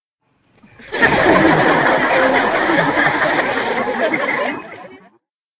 Apparently I made this for my animation which supposedly a parody of sitcom shows...and since I find the laugh tracks in the internet a little too "cliche" (and I've used it a bunch of times in my videos already), I decided to make my own.
So, all I did was record my voice doing different kinds of laughing (mostly giggles or chuckles since I somehow can't force out a fake laughter by the time of recording) in my normal and falsetto voice for at least 1 minute. Then I edited it all out in Audacity. I also resampled older recordings of my fake laughters and pitched down the duplicated tracks so that it would sound "bigger".
Pardon for that tiny screech sound toward the end as I never silenced out the background noises of the audios.
Thanks :)